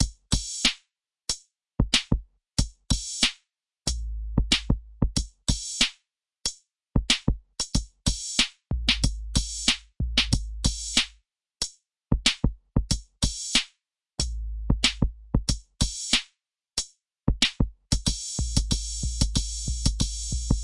93 Hip Hop drums
93bpm Hip Hop Drum Loop
808, 93, hip-hop, kick, mojo, mojomills, snare